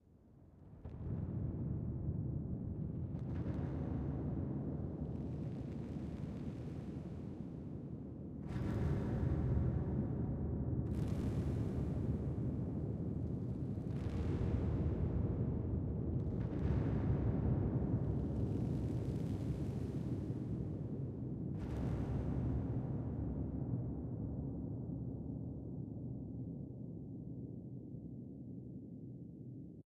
Cave Drone
Like a Creepy Fire Cave Sound
using the ZoomH6 Recorder.
cave, ambient, creepy, thrill, horror, atmosphere, drone, fire, dark